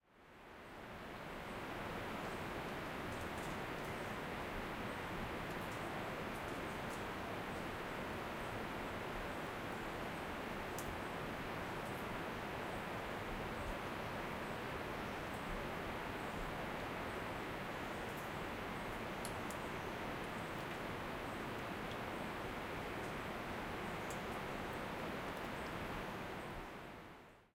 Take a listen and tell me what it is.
Quiet Forest Ambience
Field recording of a quiet part of a forest.
Recorded at Springbrook National Park, Queensland using the Zoom H6 Mid-side module.
ambient, background, field-recording, ambience, atmosphere, quiet, forest